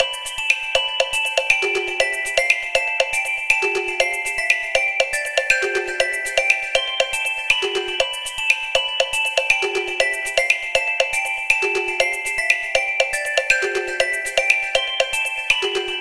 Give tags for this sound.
perk 120bpm loop

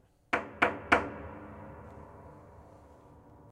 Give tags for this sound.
horror sound acoustic sound-effect industrial

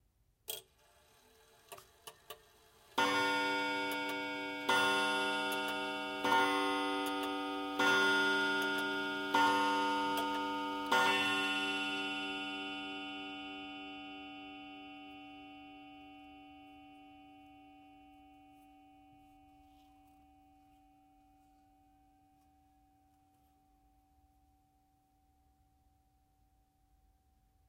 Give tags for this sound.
delft chime

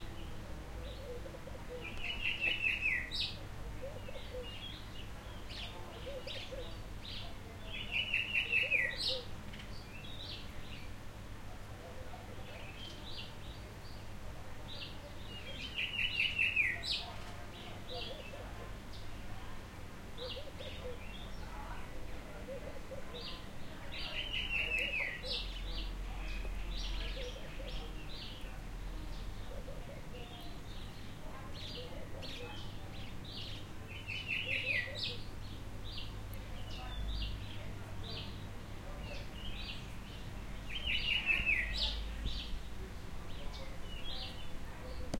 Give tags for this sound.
Park birds nature people wind

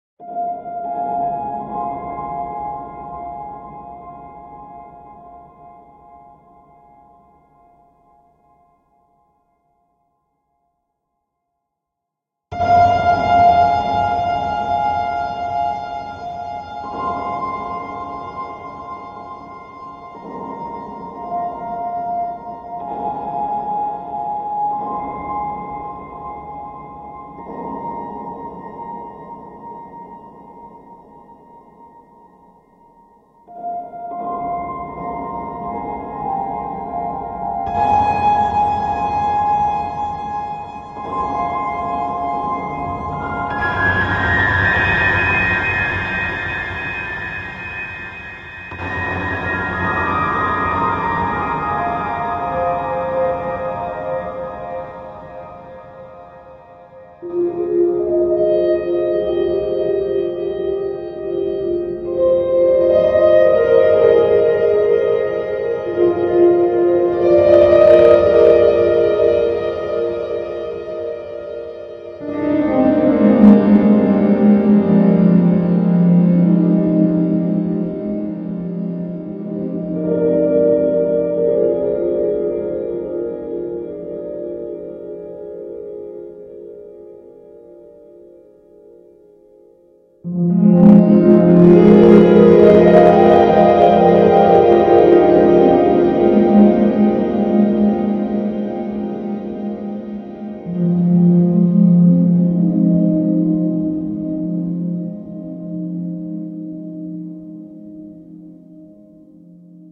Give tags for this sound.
ambiance ambient atmosphere bass chords distorted distortion Drums effect electronic game loading loopmusic loops low music noise pass Piano processed project reverbed samples screen sound sounds synth

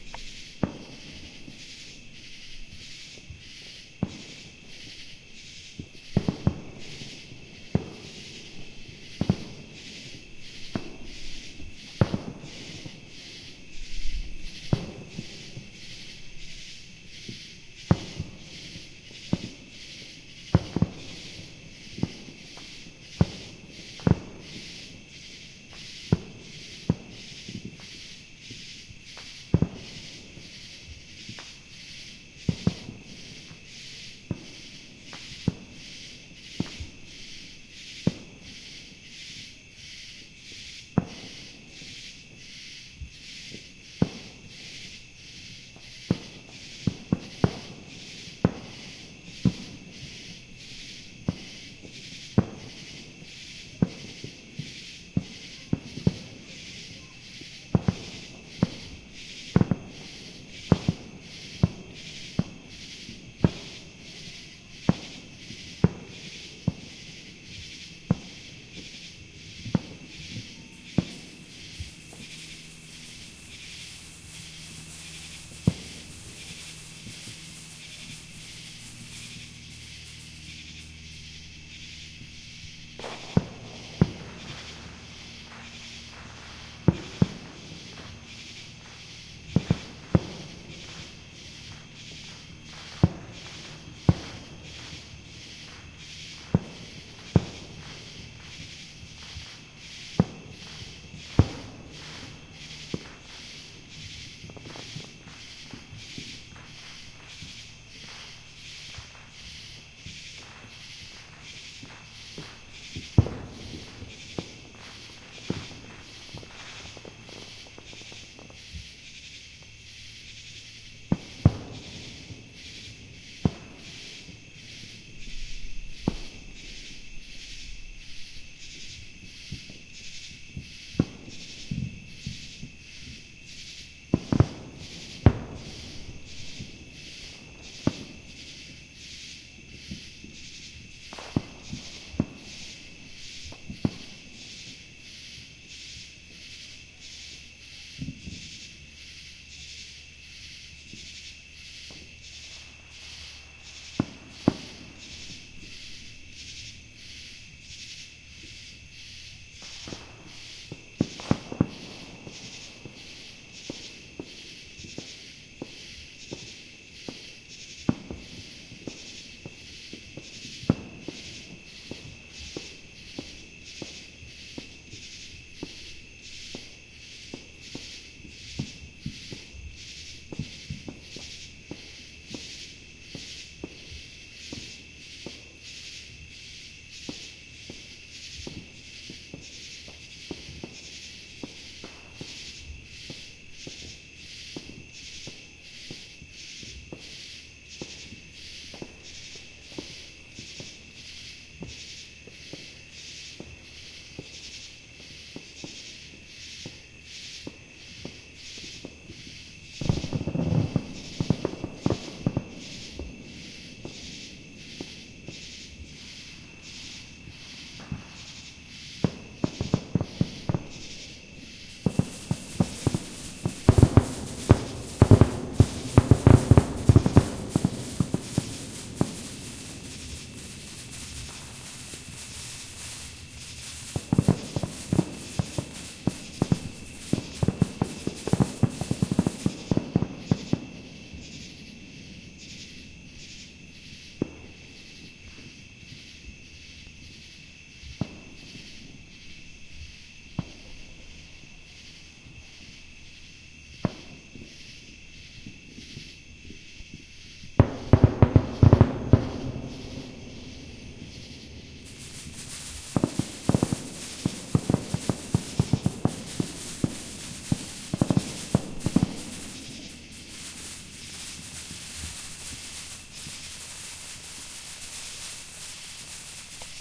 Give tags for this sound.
summer insect canon fireworks fire war grasshoppers georgia civil july sounds katydids atlanta cicadas

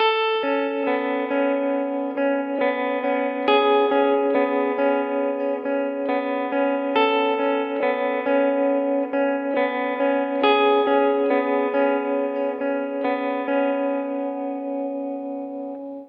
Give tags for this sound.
chord delay guitar loop melodic music plucked tremolo

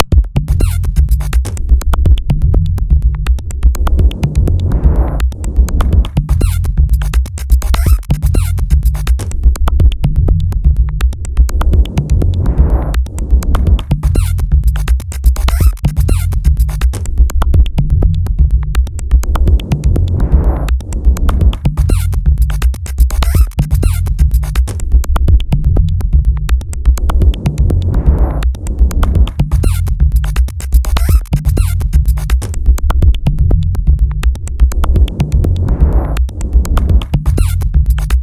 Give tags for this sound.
industrial loops machines minimal techno

